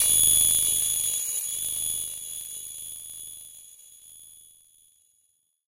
Viral Infection FX 01